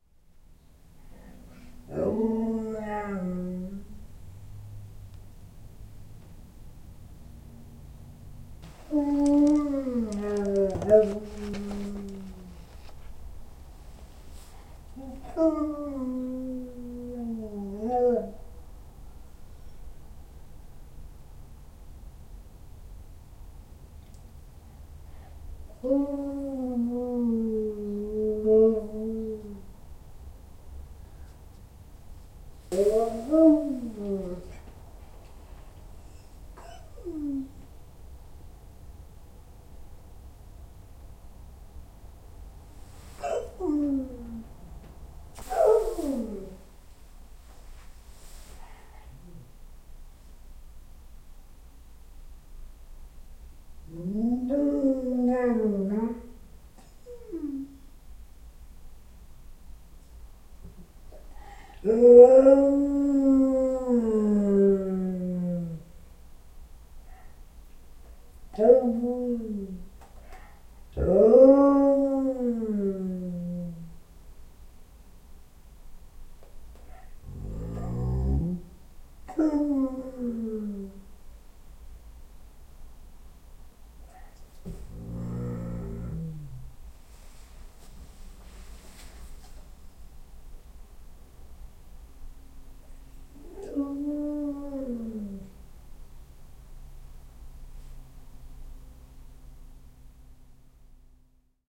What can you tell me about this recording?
My three year old Malamute, Boris, bemoaning the fact that my wife has left him alone with me.
dog, husky, malamute, moan, howl, wolf, field-recording